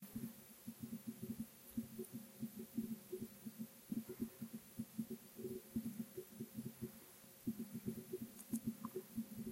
Gas heating
This noise doing Karma heating.